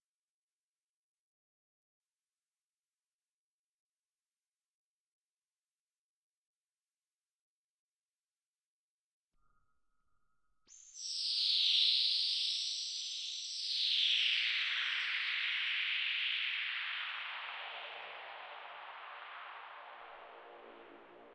the cube pad espacial
They have been created with diverse software on Windows and Linux (drumboxes, synths and samplers) and processed with some FX.
pack, sample-pack, bass, loops, remix, percussion, synths, bassdrum, the-cube, fx